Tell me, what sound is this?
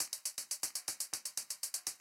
MOV.bet 3 120
Computer beat Logic